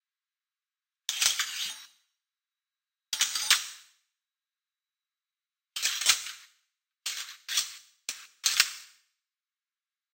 Kitchen Items
Recorded in the kitchen, filtred in Ableton Live 9.
I used gate, eq, compressor (3 times), reverb, erosion and chorus.
As I think, it'll be cool to use with another snare sound.
The recording made on iPhone 5s.
it's interesting to know how you used the sound.